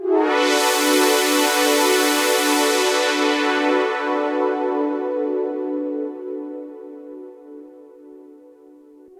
roland sweep
simple sweep created from old analogue synthesizer
sweep, pad